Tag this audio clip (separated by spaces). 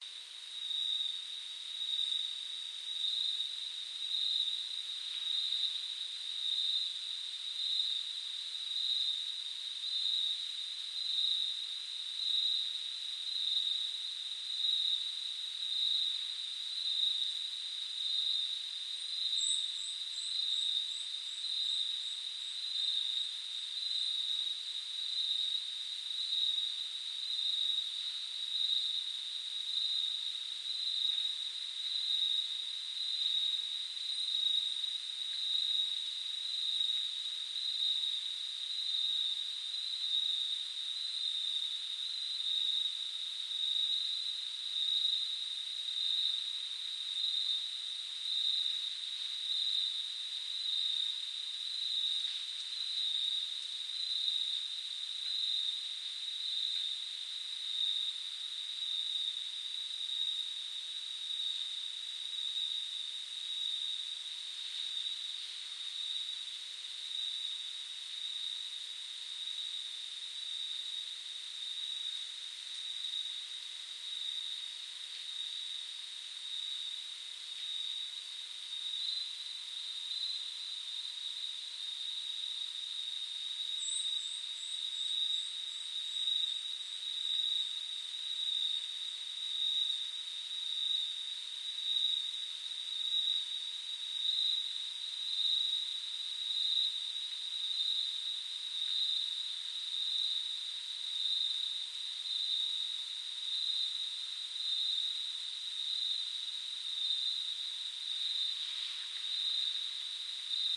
ambiance ambient atmos atmosphere background background-sound crickets field-recording film insects night soundscape